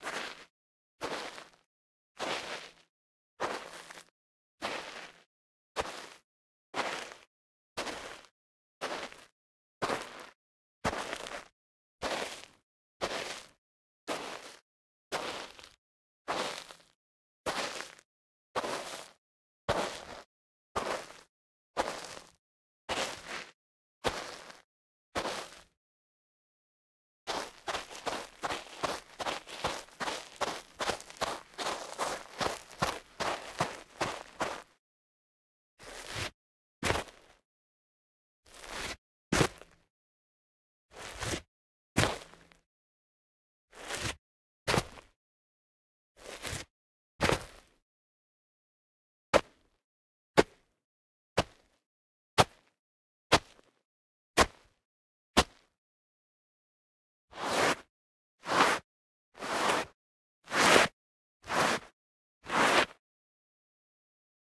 Footsteps Mountain Boots Wet Sand Sequence Mono
Footsteps sequence on Wet Sand - Mountain Boots - Walk (x24) // Run (Sequence) // Jump (x5) // Scuff (x7) // Scrape (x6) //
Gear : Rode NTG4+
boot, boots, feet, foley, foot, footstep, footsteps, jump, land, mountain, run, running, sand, scrape, scuff, shoe, shoes, sprint, step, steps, walk, walking, wet